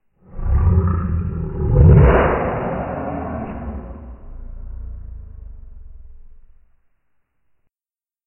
When I first tested out my Zoom H5 I began talking into the mic and then all of a sudden I needed a sneeze. I was about to stop the recording but then thought it would be good to record the sneeze to see how it handled my loudness.
Well it coped very well and the recording came out perfect!
I then played around with it in Audacity and slowed it down -86% which makes me sound like a roaring lion. So I thought I'd upload this slowed down version too!